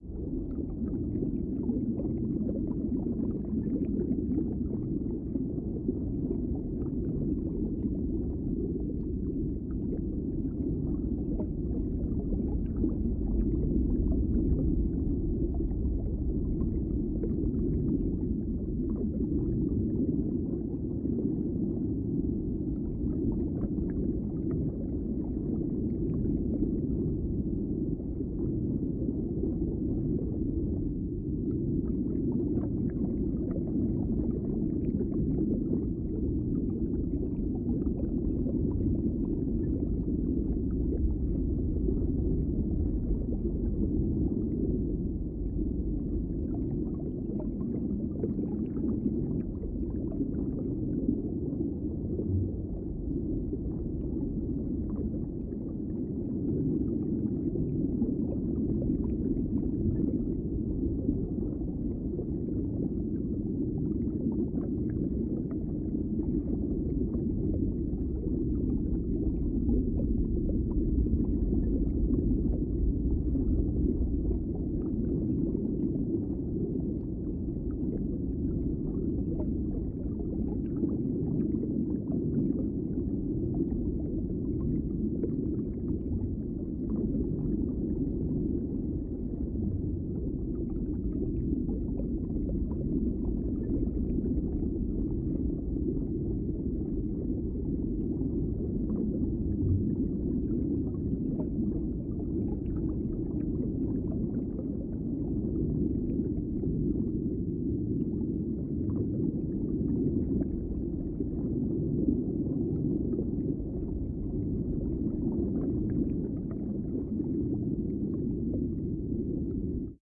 This is an underwater ambient loop I created for a game-jam project.
The sound was created by layering hydrophone recordings with filtered noise.